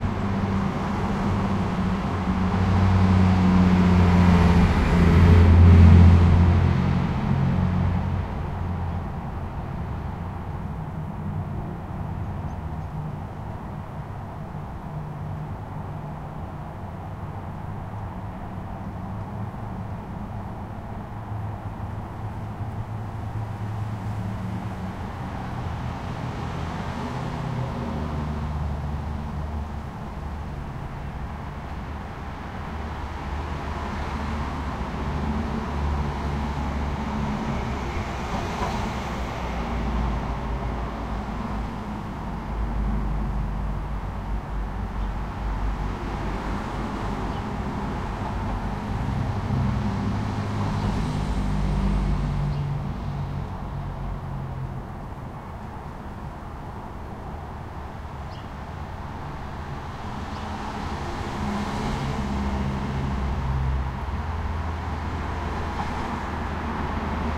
Traffic busy urban street, distant birds - Auckland, New Zealand
Traffic on a busy urban street: cars, loud trucks, soft local birds. One-lane through street, traffic is constantly passing by, no cars stopping, honking, no voices.
Recorded in Auckland, New Zealand in October on a dry day.
ambience, field-recording, noise